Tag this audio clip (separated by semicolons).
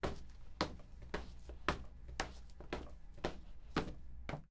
feet; foot; step; steps; walking